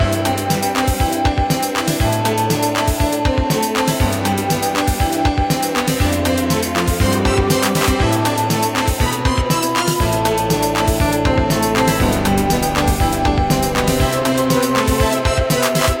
short loops 09 03 2015 3
made in ableton live 9 lite
- vst plugins : Wombat Solina violin, B8Organ, Sonatina Choir1&2/flute, Orion1, Balthor - All free VST Instruments from vstplanet !
- midi instrument ; novation launchkey 49 midi keyboard
you may also alter/reverse/adjust whatever in any editor
gameloop game music loop games organ sound melody tune synth piano